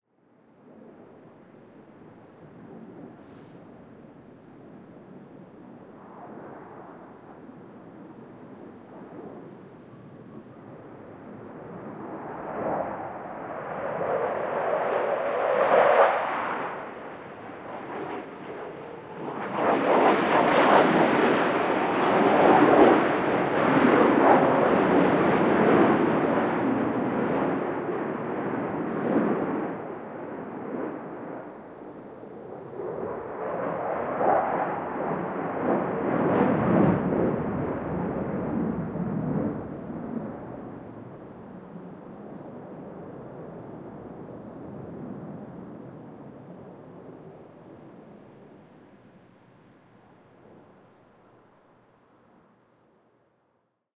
Dogfighting Jets
Three jets jockey for position over a small town
aviation, dogfight, flight, jet, plane